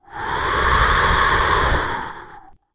wind monster03
monster, breathe, wind, beast, air